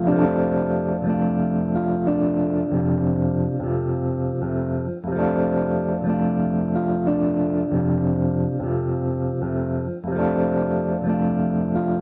A very lofi guitar sample